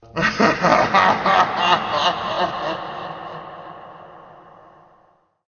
17 Evil laugh
Evil laugh recorded for multimedia project
evil, laugh, witch, hag, horror